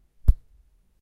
Fist bump kick
Two fists being bumped together. Could be used as a layer in a kick drum.
bump, drum, Fist, kick